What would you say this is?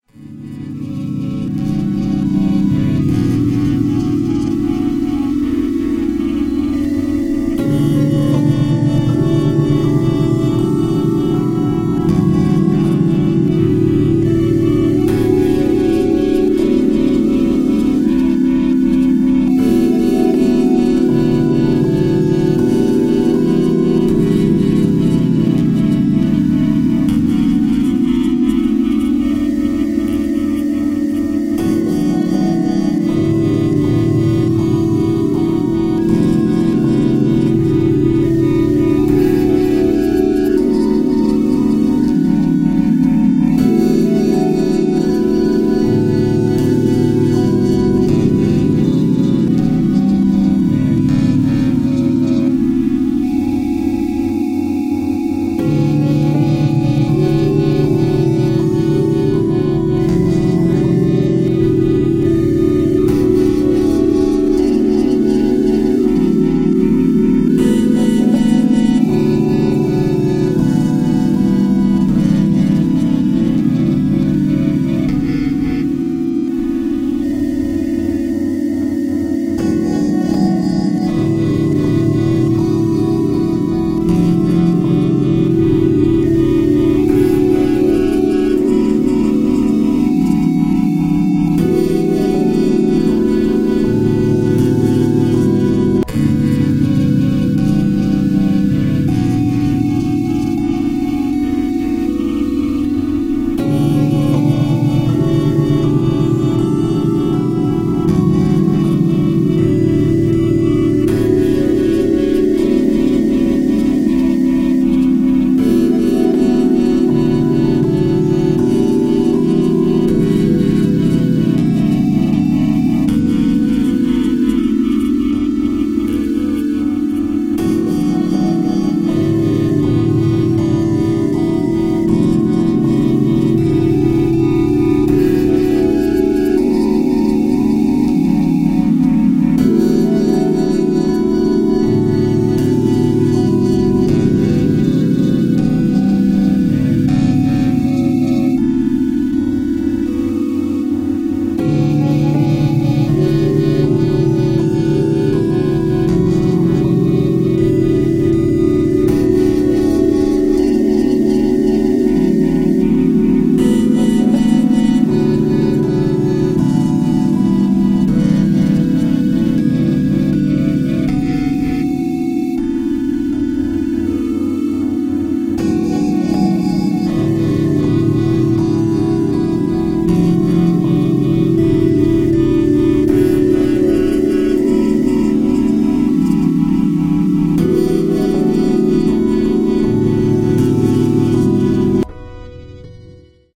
ambient electronic drones
ambient, electronic, drones